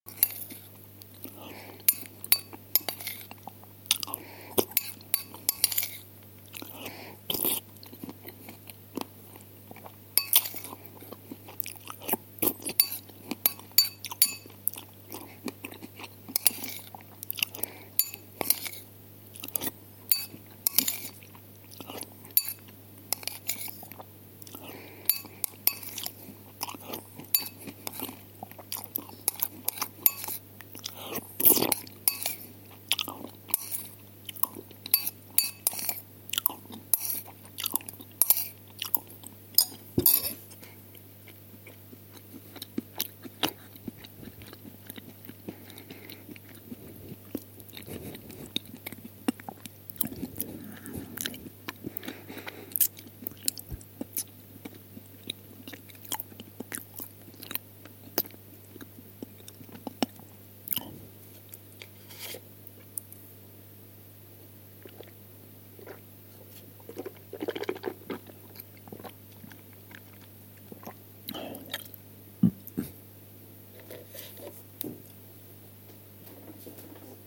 Swallowing cereals
Some spoon sounds can be heard.
breakfast, cereals, chew, chewing, food, morning, spoon, swallowing